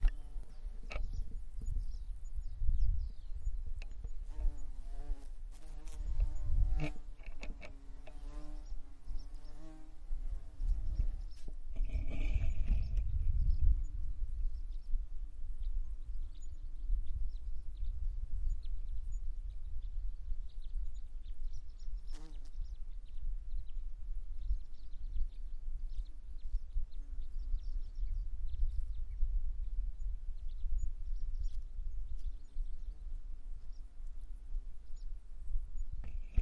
Bees and the Birds 002
A mixture of chasing bees around the garden and leaving the microphone sat recording the atmosphere or a large number of bees collecting pollen from a bush in the Scottish countryside.
Bees, birds, garden, nature, Scotland, summer